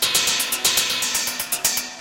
kbeat 120bpm loop 2
A drum percussion loop at 120bpm.